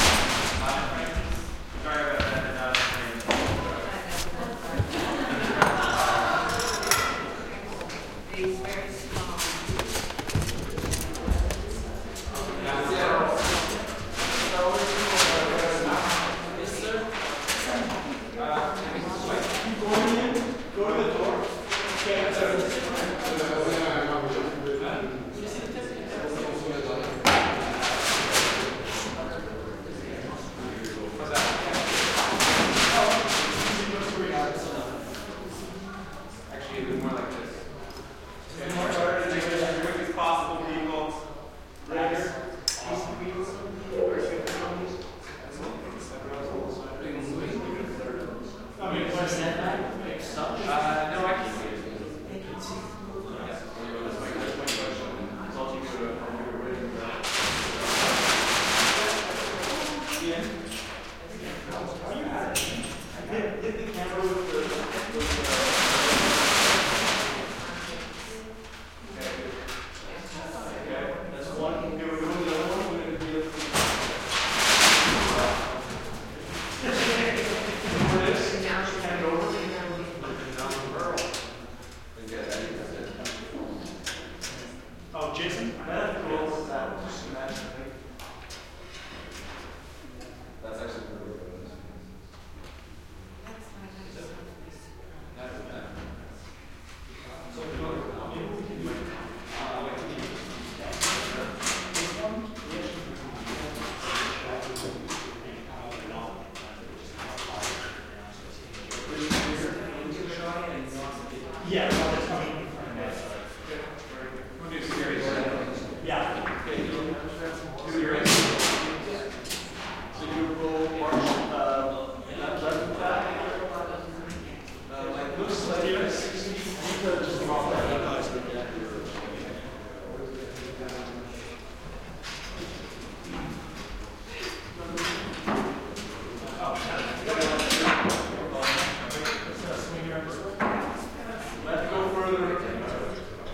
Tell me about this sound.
film set roomy voices and gaffers working thuds, gels rolling up in large hall1
voices, film, working, set, crew, gaffers, roomy